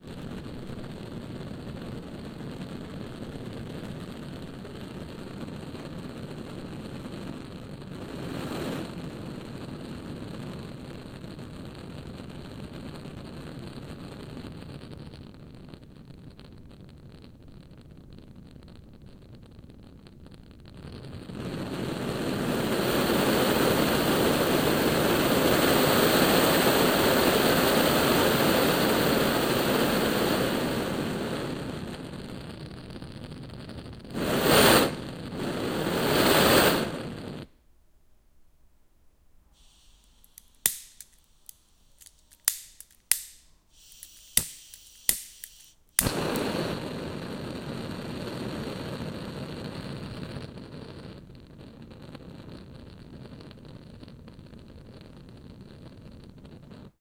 Playing with blowtorch.
blowtorch, flame, fire